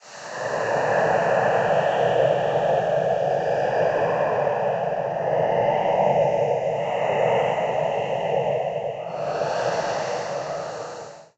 horror Ghost sound
I created this sound with my voice in Adobe Audition CC 2017 and then I used the Crowd Chamber plugin to do the ghost effect.
Horror, ghost, haunted